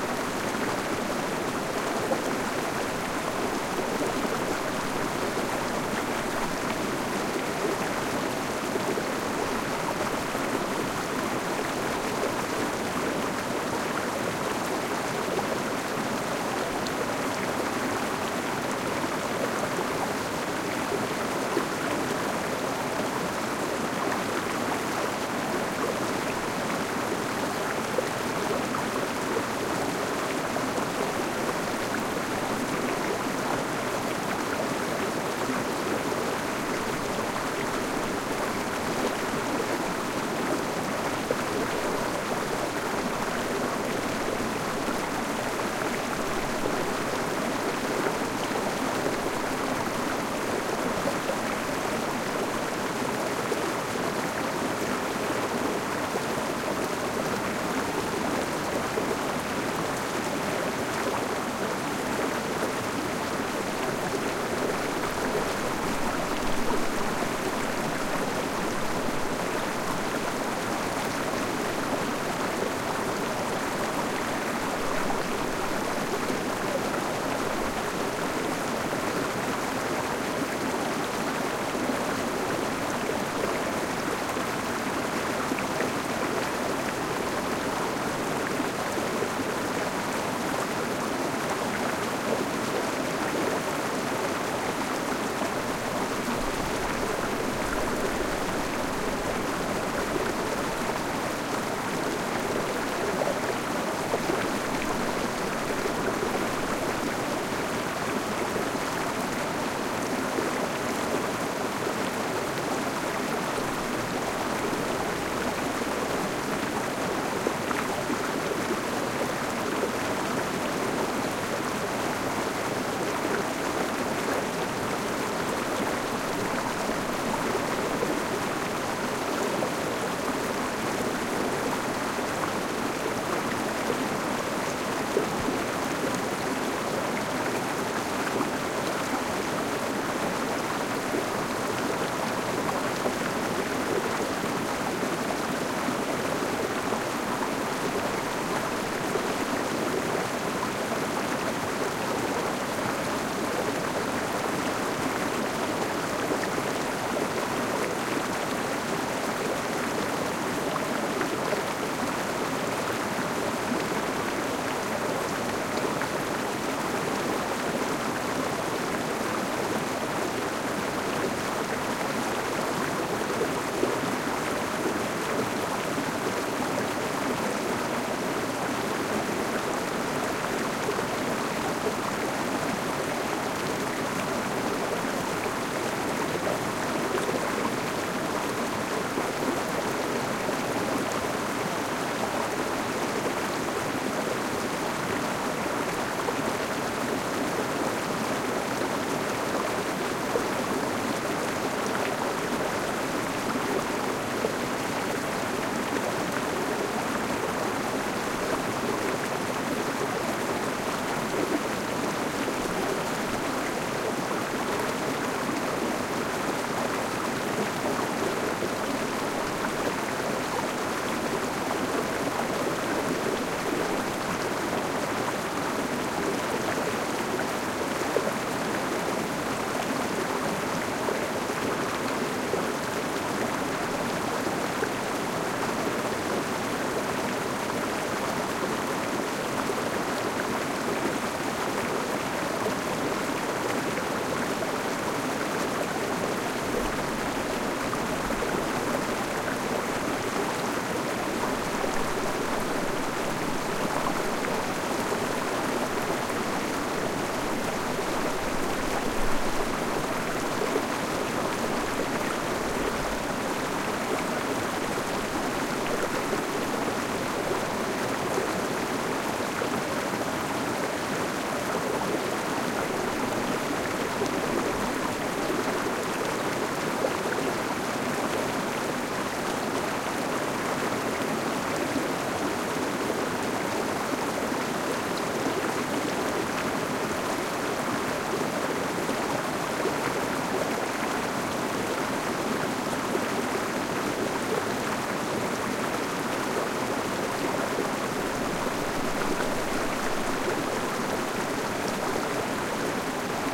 above chocolate falls
On the side of Mount St. Helens there is a small river that only flows in the afternoon once the sun melts snow from a glacier. The water is usually brown and goes over a falls known as Chocolate falls.
Recorded with a pair of AT4021 mics into a modified Marantz PMD661.
nature, flow, relaxing, field-recording, creek, loop, liquid, river, ambience, water, outside, stream, geotagged